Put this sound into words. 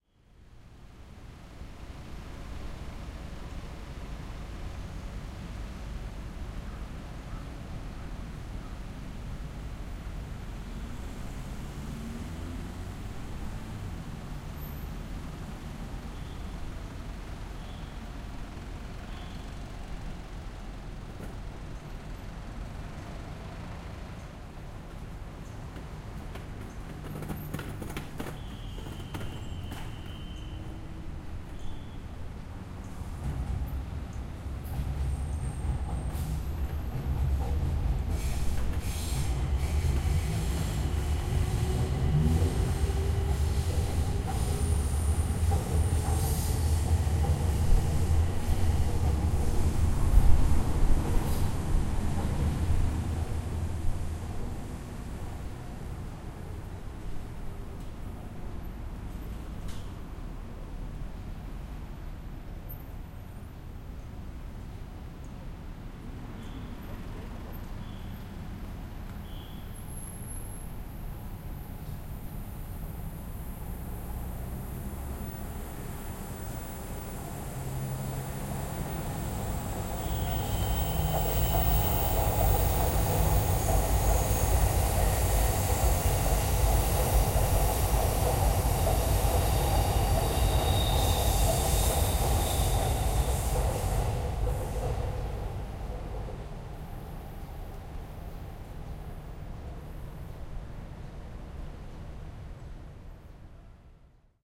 Traffic, cars waiting, birds, traffic agent, machine.
20120807